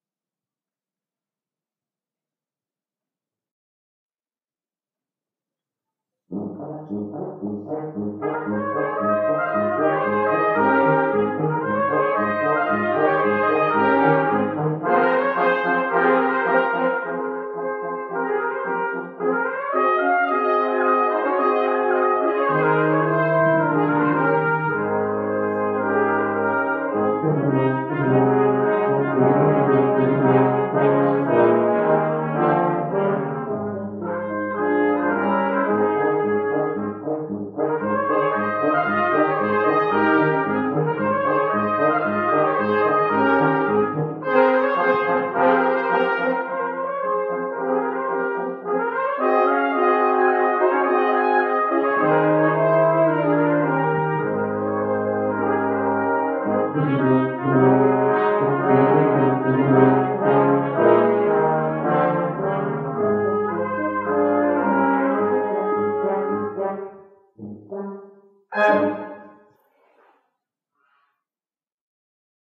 concert-church-hallway
We attended a classic concert in a garden of a church, I believe it was in Dordrecht. This was recorded from the hallway, so it sounds distant
church, concert, hallway, music, outside